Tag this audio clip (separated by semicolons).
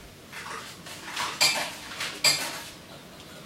insert; machine; money; vending; vending-machine